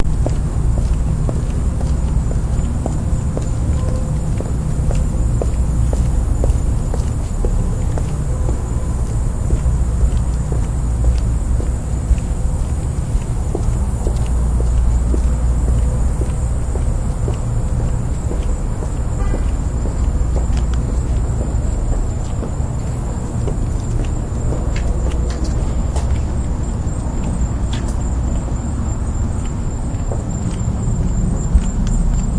SonyECMDS70PWS conf2
electet, microphone, digital